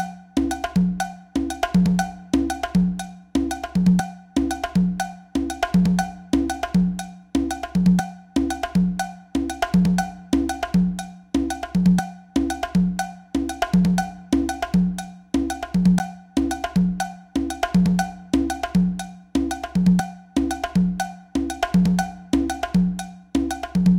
Song4 PERCS Fa 4:4 120bpms
blues, rythm, Fa, Percussion, beat, bpm, Chord, loop, HearHear, 120